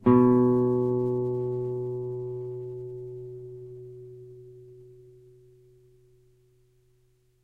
B, on a nylon strung guitar. belongs to samplepack "Notes on nylon guitar".
b, guitar, music, note, nylon, string, strings